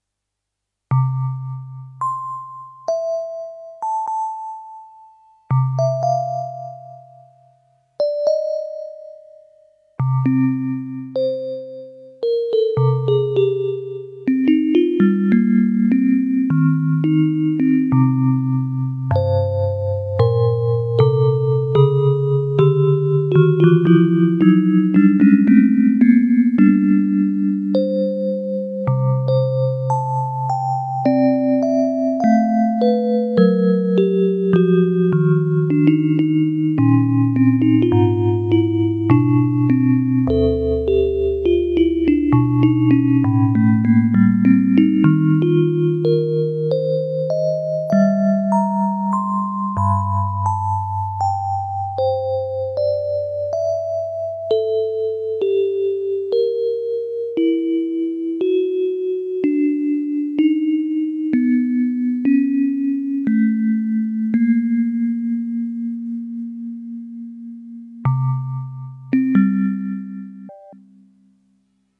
Yamaha PSS-370 - Sounds Row 1 - 06
Recordings of a Yamaha PSS-370 keyboard with built-in FM-synthesizer
FM-synthesizer, Keyboard, PSS-370, Yamaha